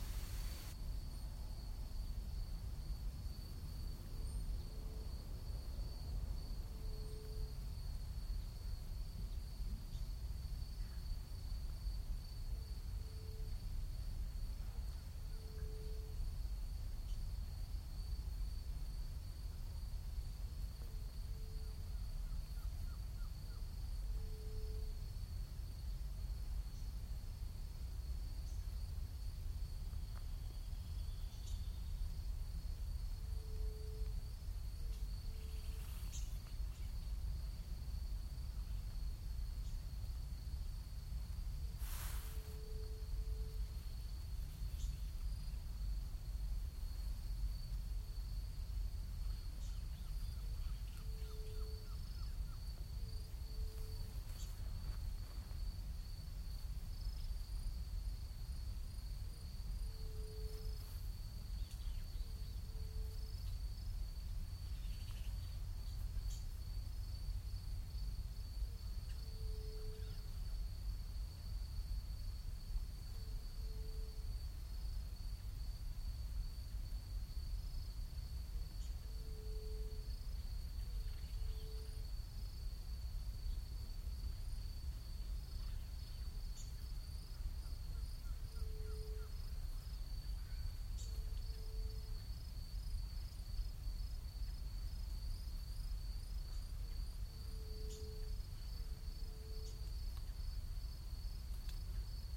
Sounds from the Atlantic Rainforest
We were staying at an eco hostel outside of Foz do Iguaçu in Southern Brazil, and I had a moment to go over to the edge of the forest and record the sounds of the animals on my phone. You can hear a faint wind overhead. It was a peaceful afternoon.